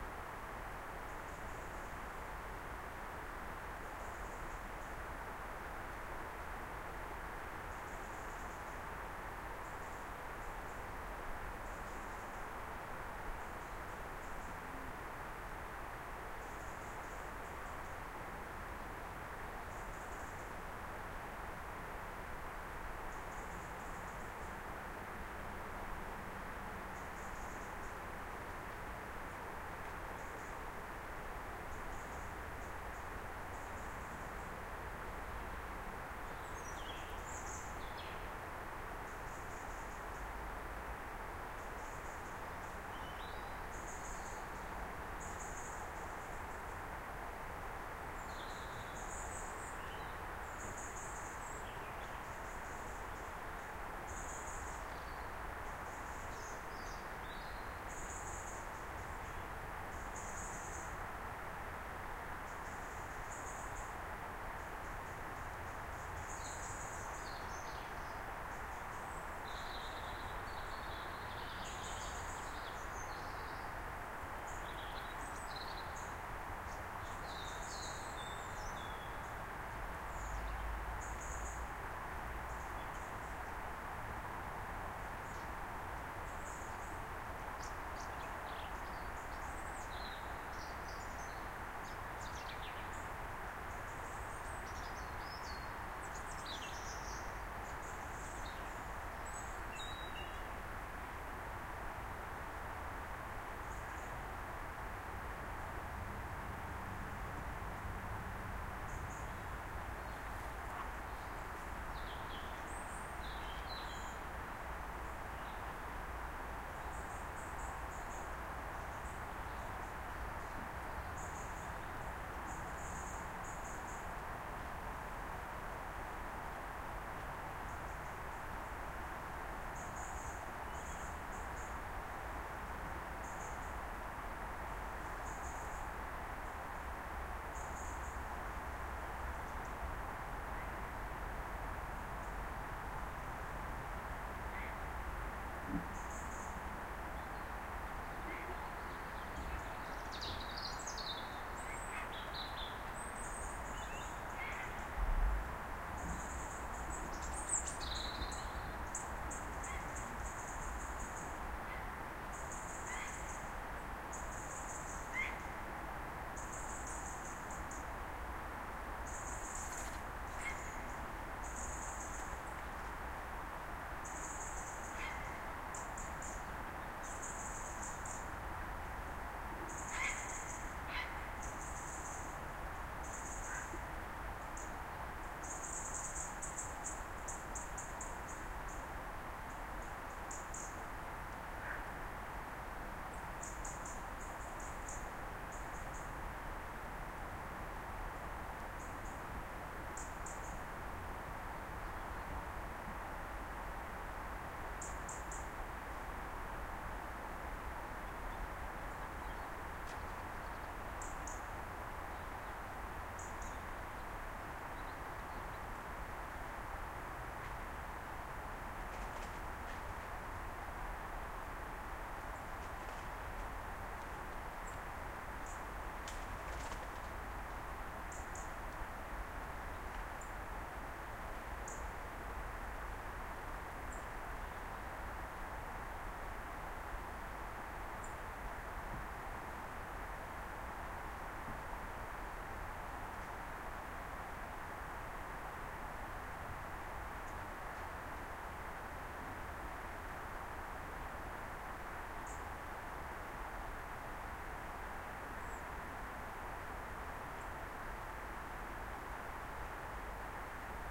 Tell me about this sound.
A few minutes of a quite evening in rural Scotland.
All that was recorded with 2 Sennheiser ME 64/K6 microphones, the beachtek DXA-10 preamplifier, a Sony Dat recorder TCD-D8 with the SBM device.